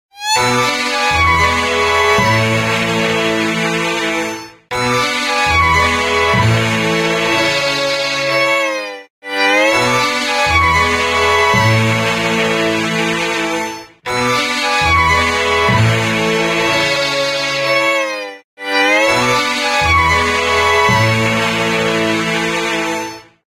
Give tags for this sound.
Tune Nokia-Contest Audiodraft Ringtone

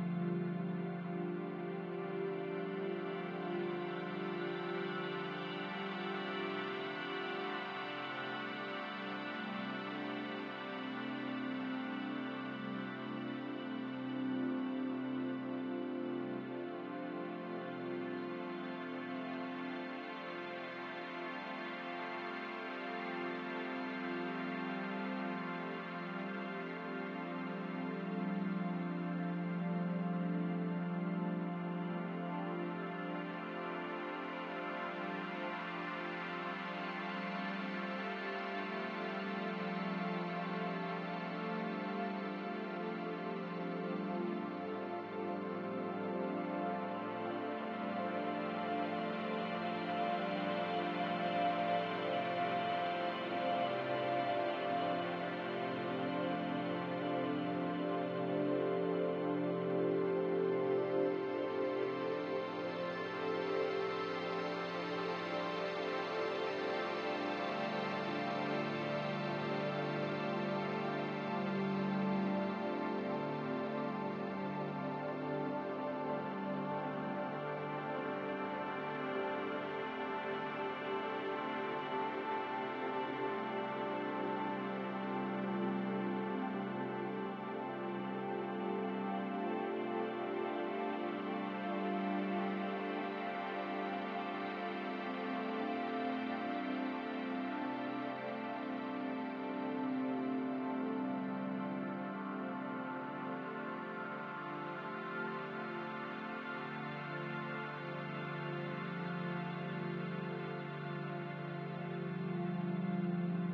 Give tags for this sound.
ambient ambient-loop atmospheric background calm deep electronic experimental loop meditation music pad relax soundscape space

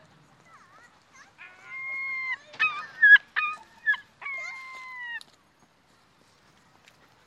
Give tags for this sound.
Waves Beach Gull

